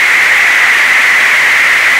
Analogue white noise BP filtered, center around 2kHz
Doepfer A-118 White Noise through an A-108 VCF8 using the band-pass out.
Audio level: 4.5
Emphasis/Resonance: 9
Frequency: around 2kHz
Recorded using a RME Babyface and Cubase 6.5.
I tried to cut seemless loops.
It's always nice to hear what projects you use these sounds for.
analog, analogue, bandpass, BP, electronic, Eurorack, filter, filtered, generator, loop, modular, noise, recording, short, synth, synthesizer, wave, waveform, white-noise